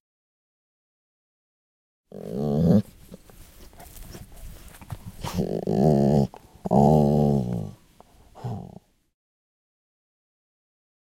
1-1 dog mumbles
Panska, Czech